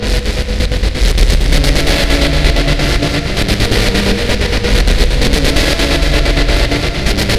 These short loops were made with a VST called Toxic 3 that is useful for making atmospheric pads. Most of the samples are dark and distorted.
atmospheric, dark, distorted, distortion, drone, loops, noise, pads